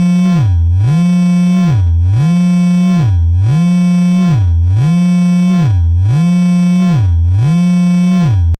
quantum radio snap026
Experimental QM synthesis resulting sound.
drone, experimental, noise, sci-fi, soundeffect